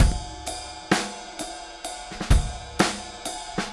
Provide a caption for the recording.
trip hop-05
trip hop acoustic drum loop
acoustic, drum, loops